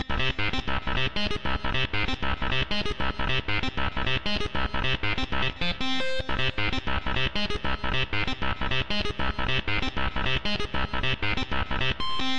rocking synth riff i used in my latest track works well with hard drums
trance, synth, delay, hard, dance, reverb